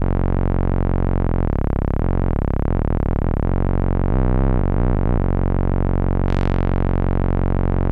ARP Odyssey sawtooth pulse
ARP Odyssey low frequency damped sawtooth with random slight frequency modulation.
synth, synthesizer, ARP